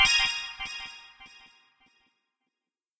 GUI Sound Effects 080
GUI Sound Effects
Game, Design, SFX